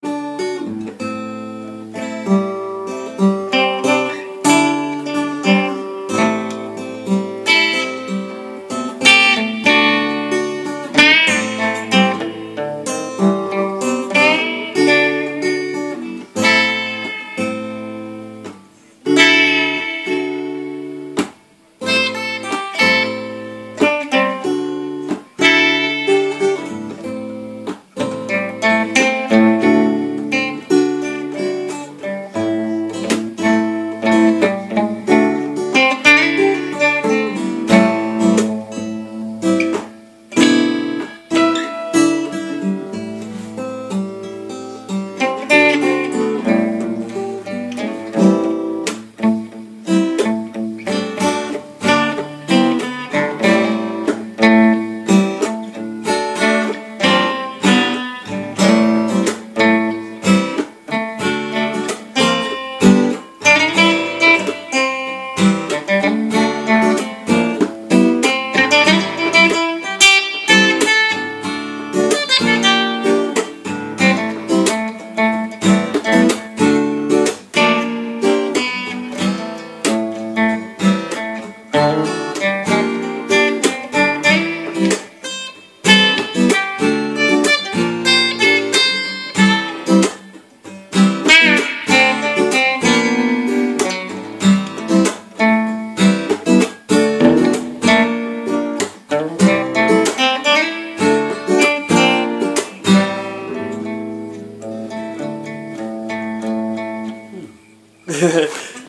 Untitled jam
Uneek guitar experiments created by Andrew Thackray
Guitar, instrumental, strings